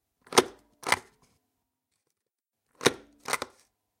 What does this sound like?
Using a stamper.
mail, seal, stamp